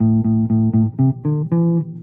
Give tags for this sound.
bass
electric
sample

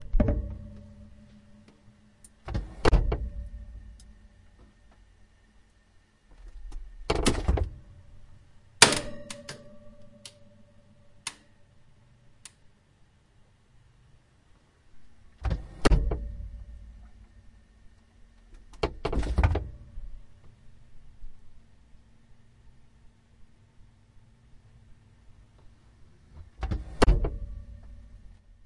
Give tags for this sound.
electric
mechanic
refrigerator

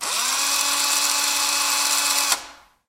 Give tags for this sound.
building
carpenter
construction
drill
drilling
electric
electric-tool
factory
industrial
machine
machinery
mechanical
tool
tools
work
worker
workers
workshop

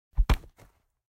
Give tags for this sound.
fighting
impact
layered-sfx
punch
swhish
swing

